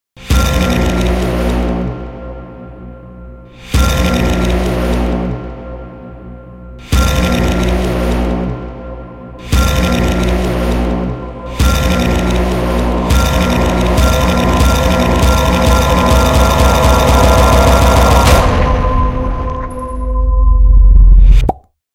Inception (kinda) build up
The always abused BRAAAAM that we so often hear in movie trailers (Zack Hemsey "Mind Heist"). Wanted to make something similar, so here it is. Everything's sampled - Chinese horn thing, rattle, and dark hit (plus choir build).
No licensing necessary.
Bram, build, build-up, dark, hit, horn, Inception, intro, trumpet, up, whoosh